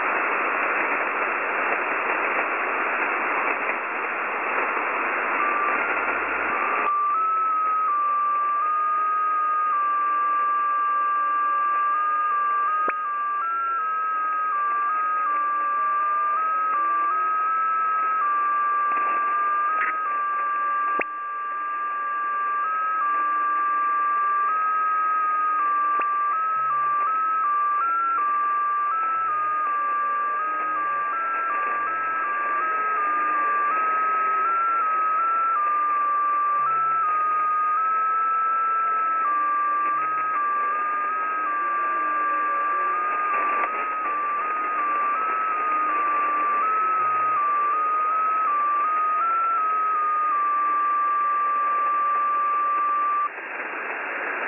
High Splitter
A high-pitched melody broadcast on 14077. Some static also. No idea what it is. Recorded on the website.
Its broadcasts are musical-sounding tones, it could be a 'numbers station' without voices but this question presently remains unanswered.
shortwave, melody, mysterious, creepy, static, 14077, tones, the-14077-project, encrypted-content, music, mystery, numbers-station, radio